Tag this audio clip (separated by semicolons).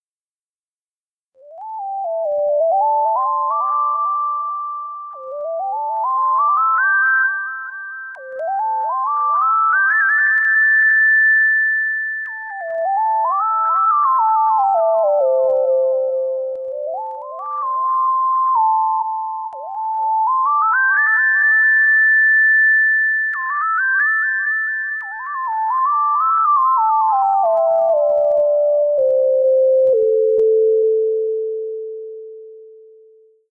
adventure; after; bright; cheerful; comes; game; happy; harmony; journey; meadow; movie; positive